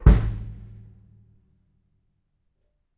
kick 1 with start
Individual percussive hits recorded live from my Tama Drum Kit
live, kick, tama, drum, bass